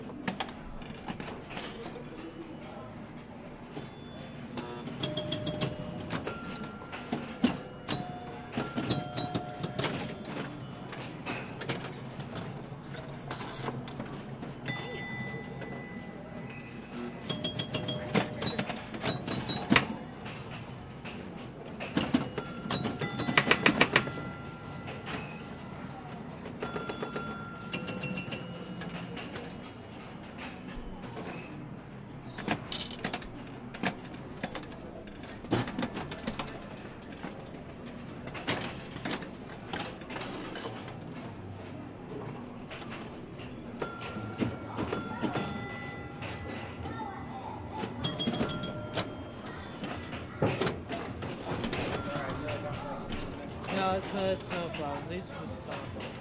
Sounds from the Pinball Hall Of Fame in LAs Vegas.